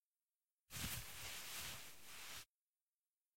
Towel using
fabric, towel, drying-hands